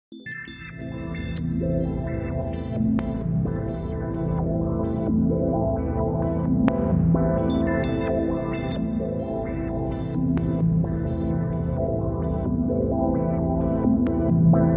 A luscious atmosphere made by adding various wet delay and reverb effects to a pad sequenced with a chord